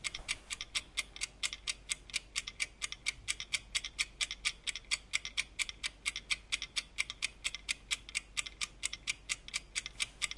PCM-D50 inside microphones 90°
As requested: here is a test of some small condenser microphones.
For the test I used a Sony PCM-D50 recorder with the setting of 6 (only on the Soundman OKM II studio classic microphones was the setting on 7) and an egg timer, 15cm away from the microphones. These were spaced 90° from the timer (except the inside microphones of the Sony PCM-D50, which I had on the 90° setting.
Apart from the inside microphones of the the Sony PCM-D50 I used the AEVOX IM microphones and the Soundman OKM Studio classic, both of them binaural microphones, the Primo EM172 microphone capsuales and the Shure WL183 microphones.
Please check the title of the track, which one was used.
microphone, PCM-D50, test, timer